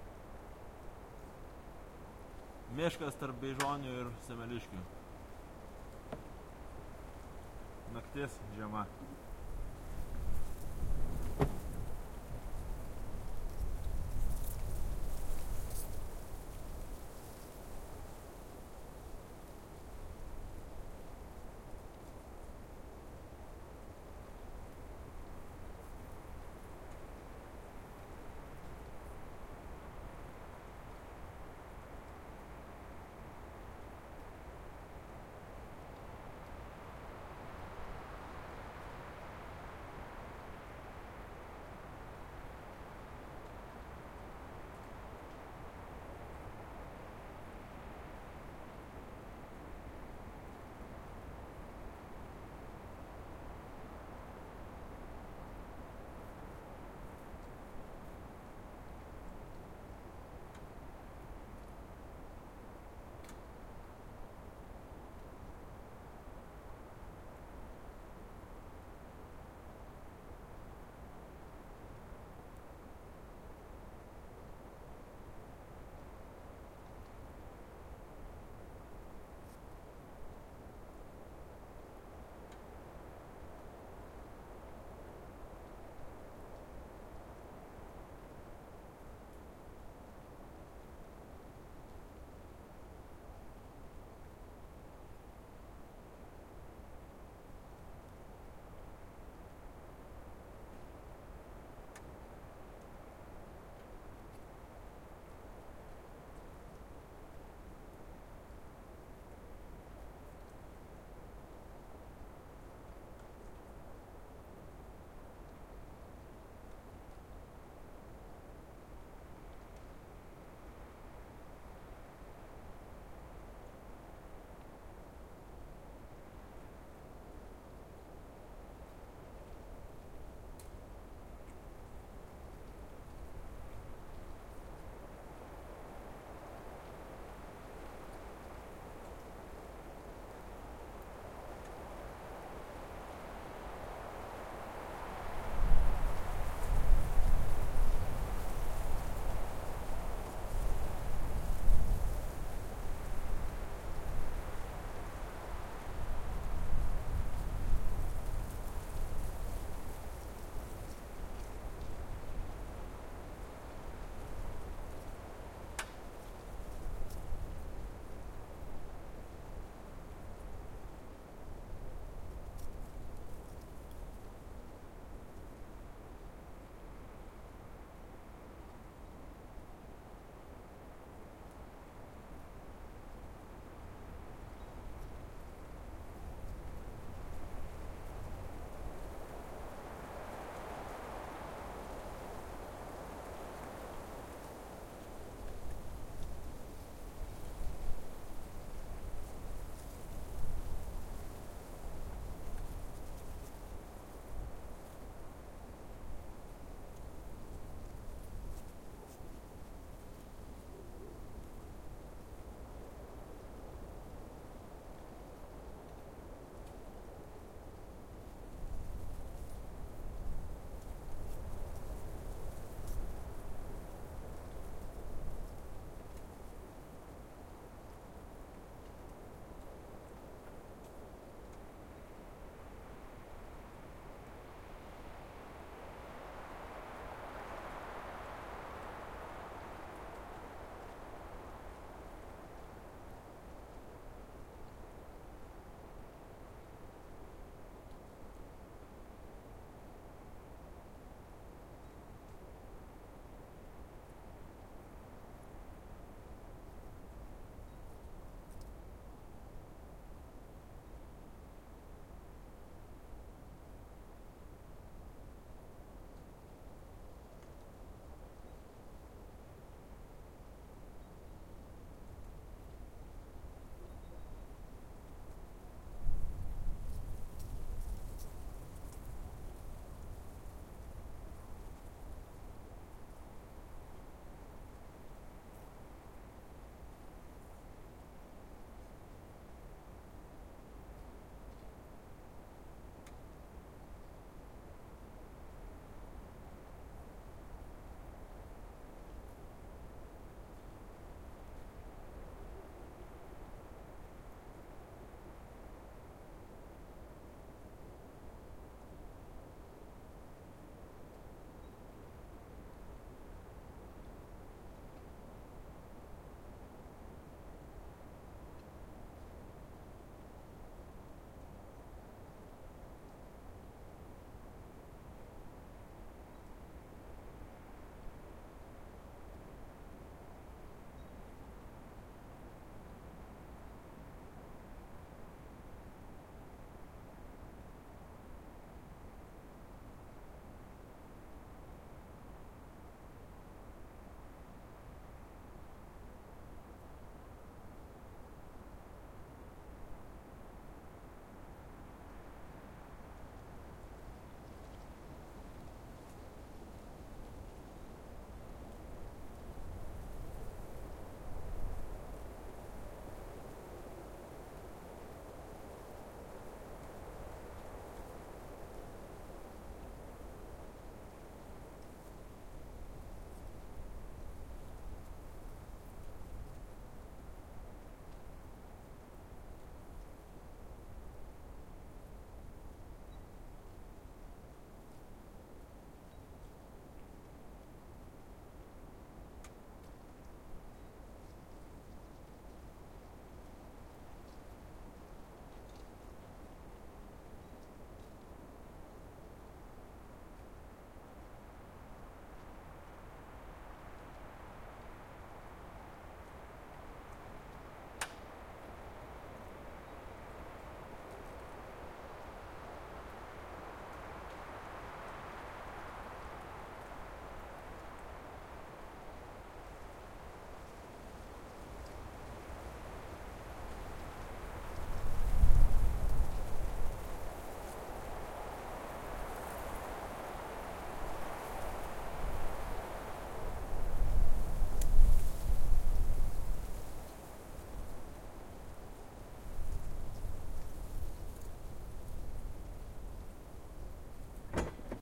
Long hi-quality quadrophonic recording of winter windy forest. Equipment - Sound devices 633 w kortwich preamp, Shoeps double ORTF system. First two chanels - mix, last four - separate ISO
field-recording, forest, nature, quadrophonic, trees, wind, Winter